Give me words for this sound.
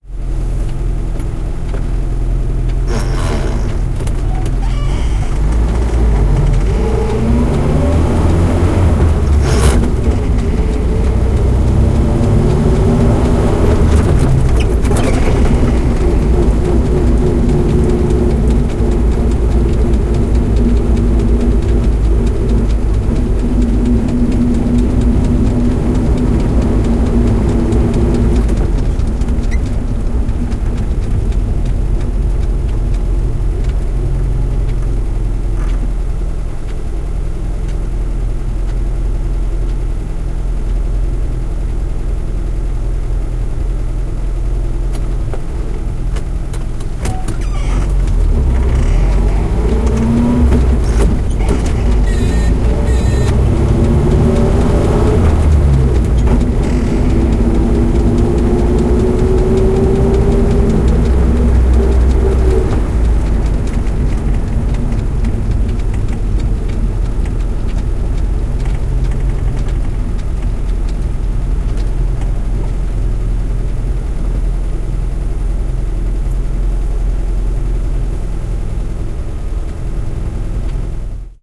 uaz469 inside 061015
Recording from inside the car named UAZ 469, russian military jeep. Car is going in late morning in city with calm traffic.
engine, city, car, field-recording, traffic